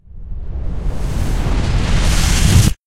Huge Explosion Part 1 - Shockwave
Part 1 of a ridiculously huge parody of a nuclear explosion for comedic effect (Played before parts 2 and 3, with a pause in between)
Created using these sounds:
explosion, nuclear, detonation, shockwave, bomb